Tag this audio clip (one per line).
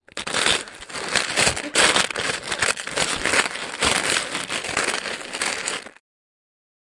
cleaning
dental
hygiene
water